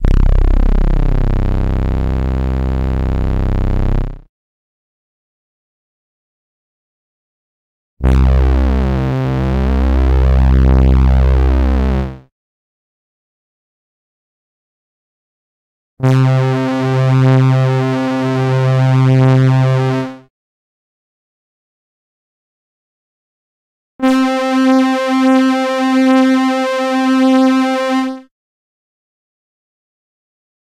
EVOLUTION EVS-1 PATCH 023
Preset sound from the Evolution EVS-1 synthesizer, a peculiar and rather unique instrument which employed both FM and subtractive synthesis. This trancey sound is a multisample at different octaves.
evs-1, patch, synth, synthesizer, trance